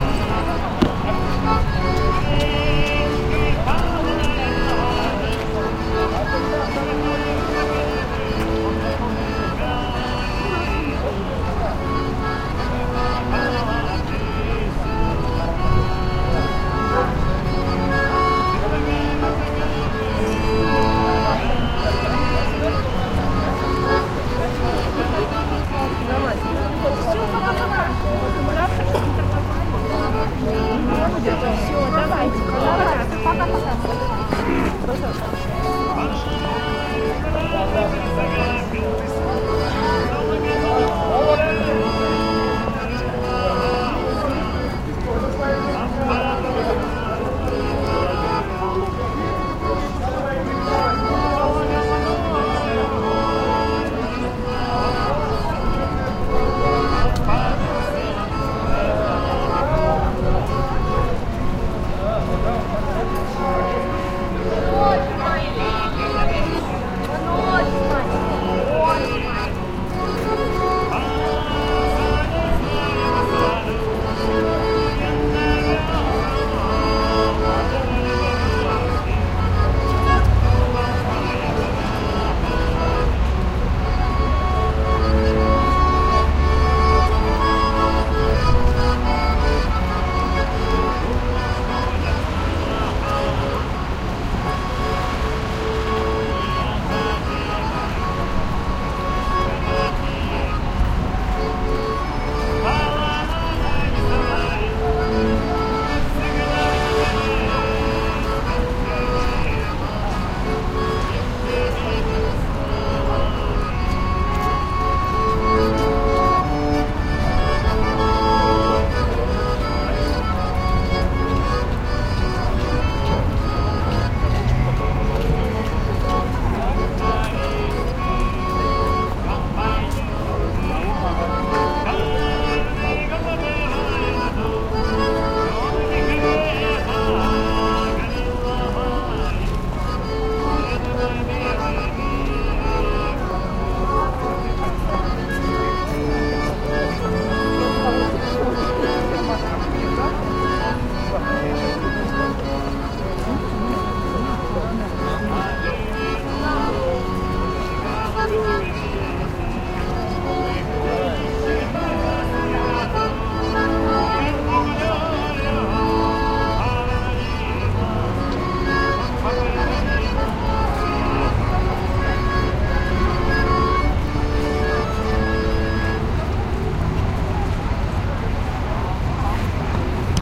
busy street with distant street musician playing on harmonica